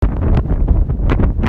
wind windy storm
storm, wind